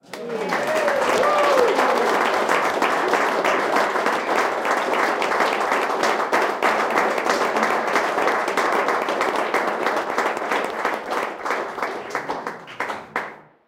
Applause CK 1
A stereo recording of applause after a performance in a very small venue. Zoom H2 front on-board mics.
ovation, stereo, applause, clapping, xy